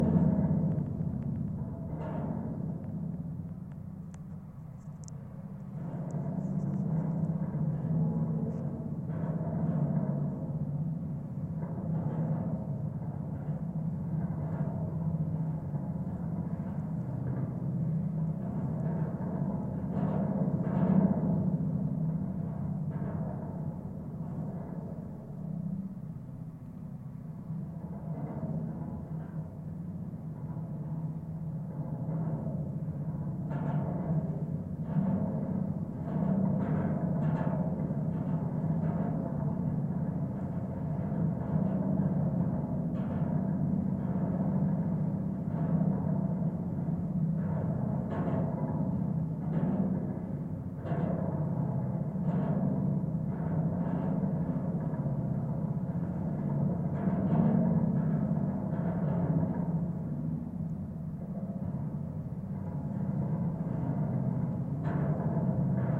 GGB 0312 suspender NE21NW
Contact mic recording of the Golden Gate Bridge in San Francisco, CA, USA at NE suspender cluster 21, NW cable. Recorded February 26, 2011 using a Sony PCM-D50 recorder with Schertler DYN-E-SET wired mic attached to the cable with putty. Near the north tower, sound is dampened and has less cable, more vehicular noise.
field-recording, mic, San-Francisco, PCM-D50, contact-mic, Schertler, Golden-Gate-Bridge, contact-microphone, bridge, Sony, cable, Marin-County, steel, DYN-E-SET, wikiGong, contact